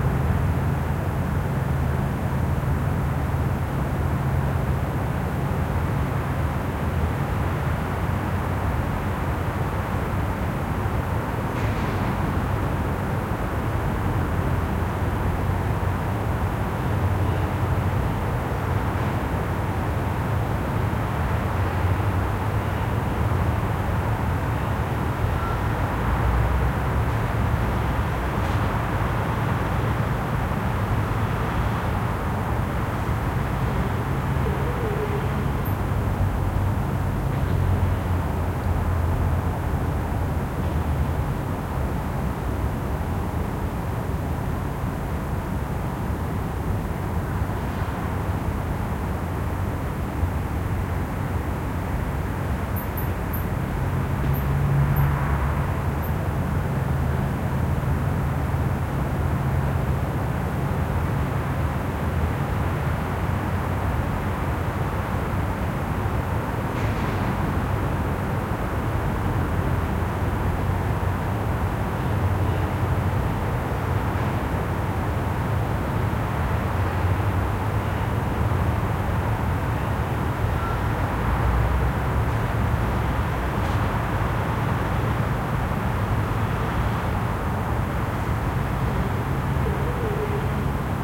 Quiet evening in the East of Amsterdam, street noises in far distance. It is a loop. Recorded with a Sony PCM-D100.